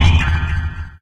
STAB 034 mastered 16 bit
A short electronic spacy effect lasting exactly 1 second. Created with Metaphysical Function from Native
Instruments. Further edited using Cubase SX and mastered using Wavelab.
effect, electronic